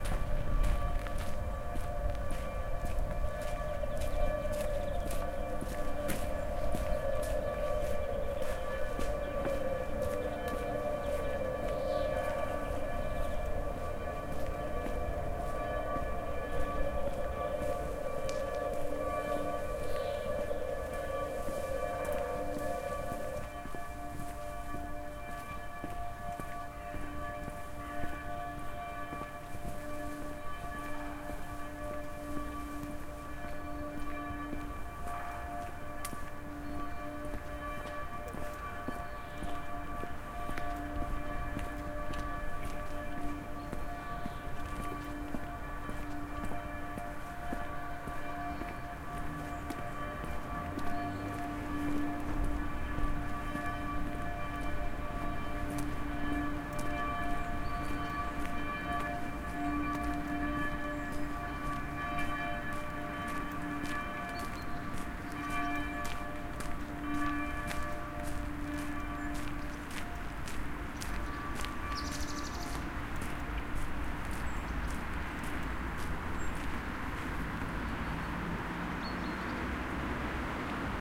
Sunday Walk
walk on Sunday with the sound of church bells in the background.
recorded with Zoom 4Hn.
sound, church-bell, footsteps, soundscape, walk, birds, field-recording, Weimar-Germany, bus-stop, street, ambient, Sunday, Weimar